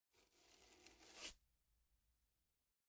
Brush On Wood
foley, Painting, wood